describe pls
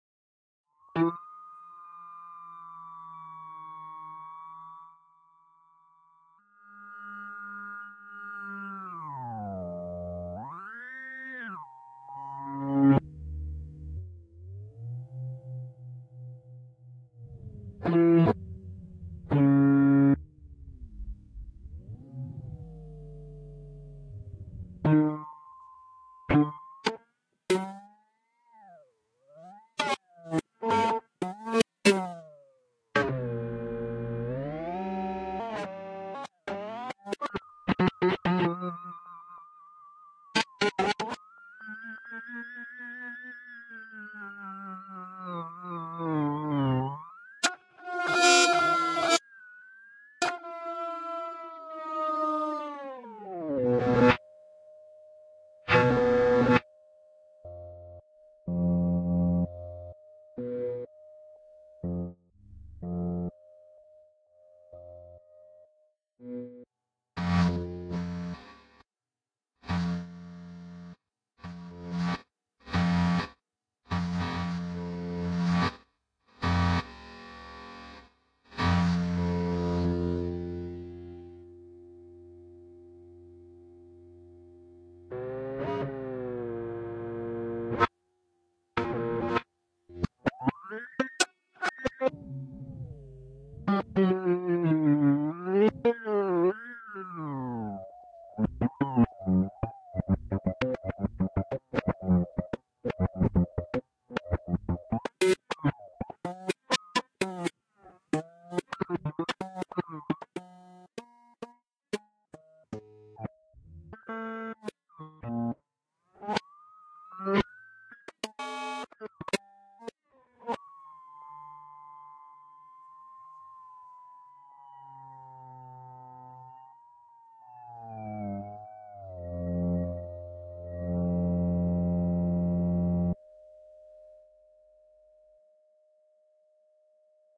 A wacom-tablet live improvisation of a spectral-analysis of a piano recording